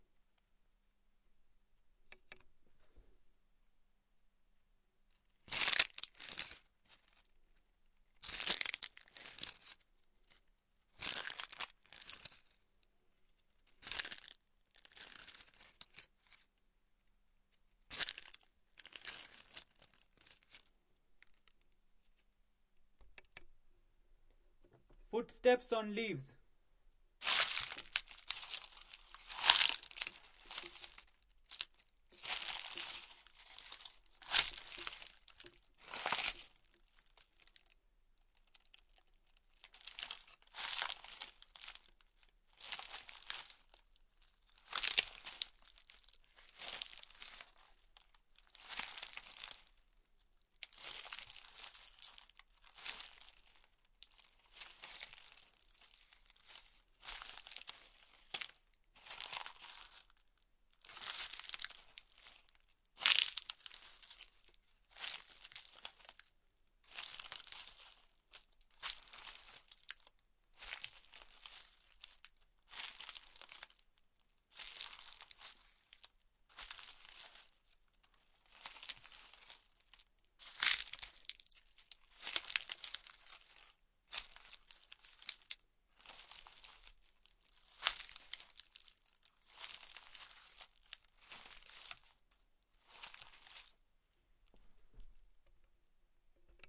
Footsteps on Leaves
Footsteps on dry leaves as it would appear in the forest or woods